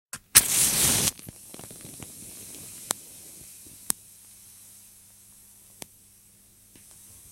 The lighting of a match. Noise and clicks are from the flame, not the recording :-)
match, burning